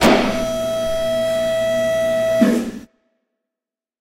hydraulic lift, varying pitches